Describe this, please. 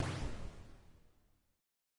This pack was created like this....
This is a mathematical simulation of an explosion. All the sound files were created using the modified Friedlander equation. This source was then convolved using
an 'Image model' of a room 3 X 3 X3.7m
based on this code by Eric Lehmann
Then I used RaySpace to model the floor that the room is in
Then I used a model of a city in Blender and some maths to calculate an IR Sweep file for Waves IR1
Finally I added some non-linearality via a valve model guitar amp plug in because explosions are non linear.
Basically you can create explosions of all scales using the original Friedlander equation produced source and various reverbs and some distortion (non-linear model).
In this case the time to zero was 16ms.
mathematical-model, explosion, blast